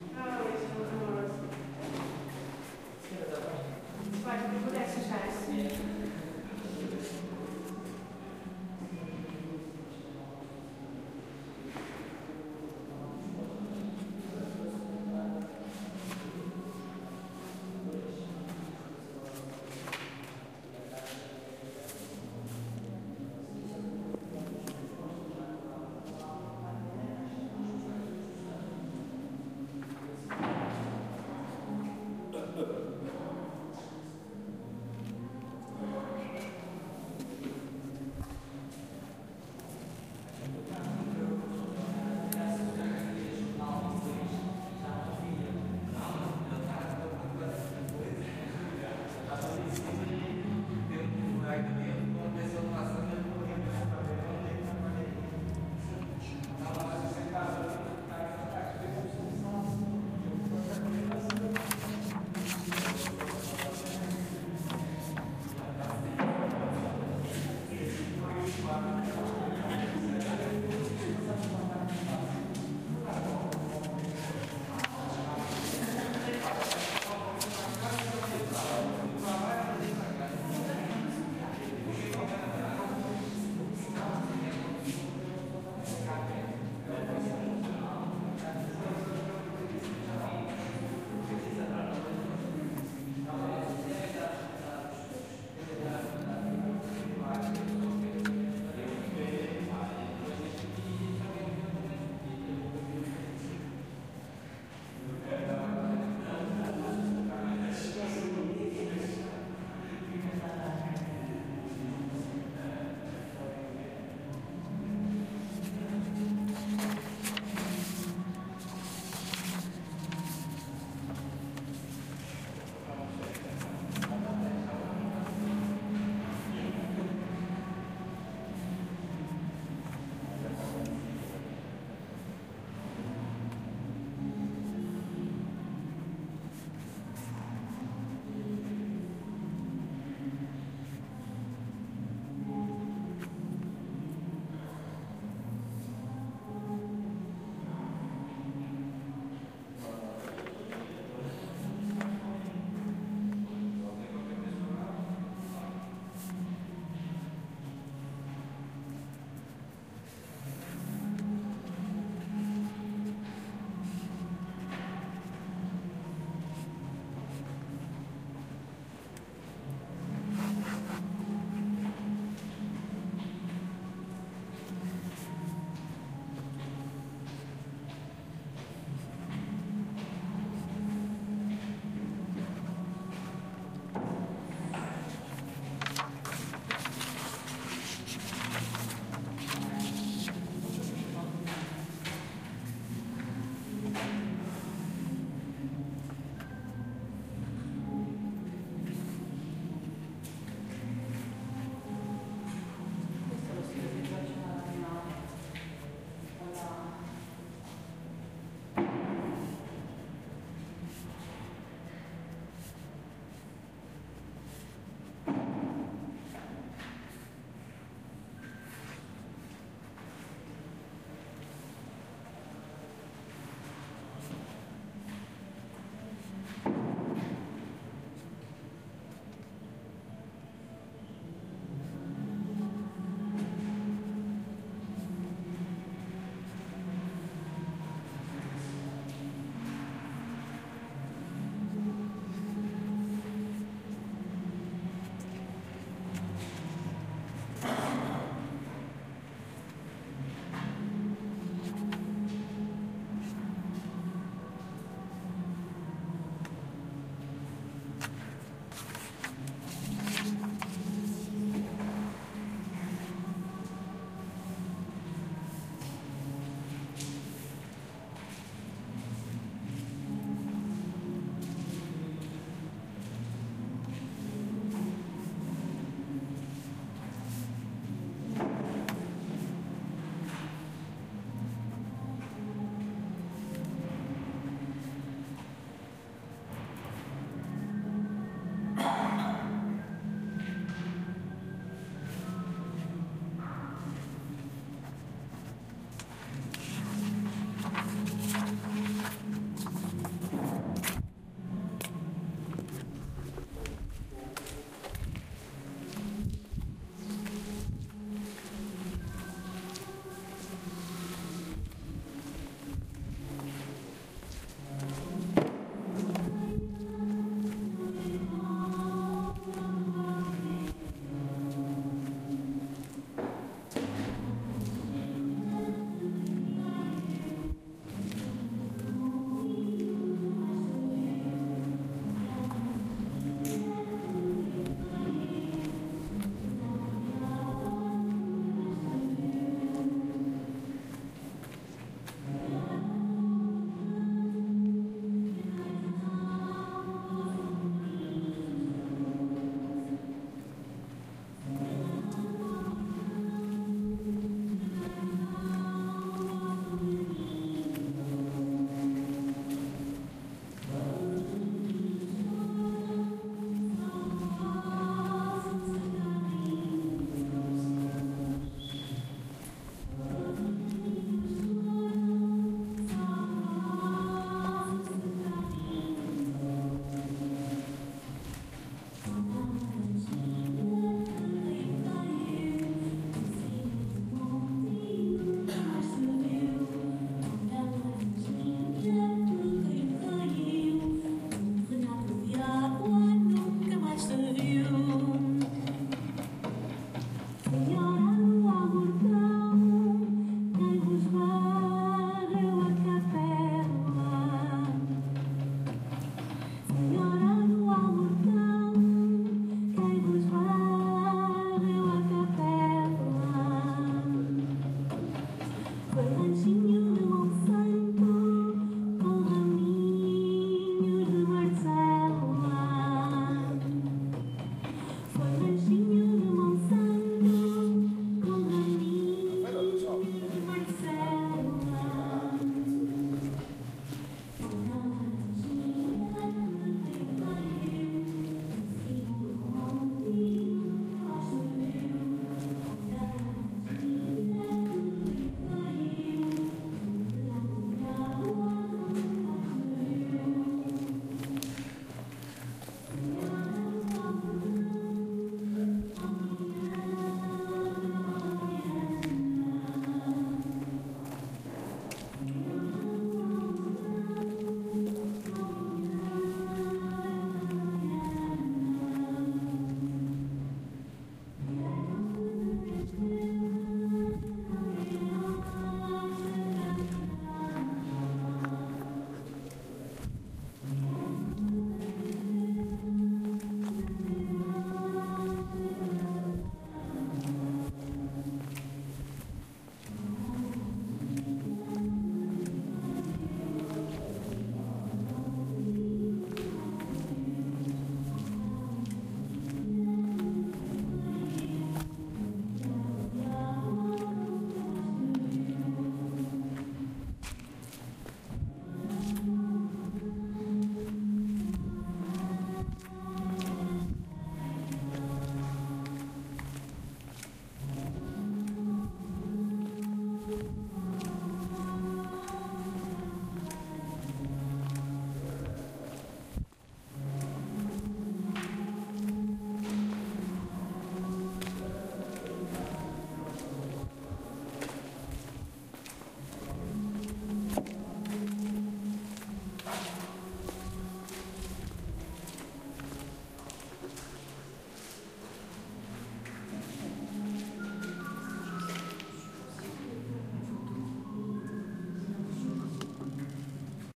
130614-exposição joana vasconcelos ajuda 04
walking around at exibithion #4
ajuda, field, joana, lisboa, portugal, recording, vasconcelos